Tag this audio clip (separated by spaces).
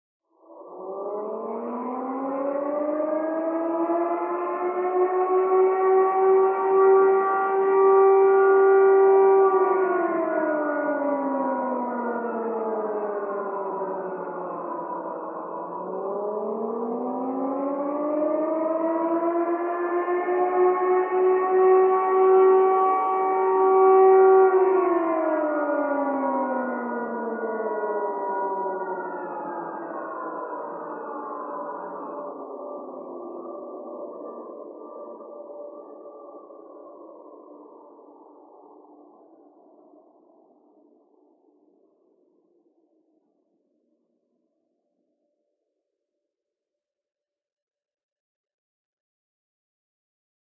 loudspeaker siren